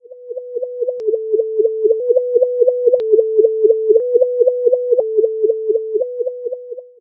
Fire siren with fade in and fade out, change of frequency, of the starting phase, of and resonance.
This song is normalized.
It's like someone who is hearing a fire siren playing with his ears.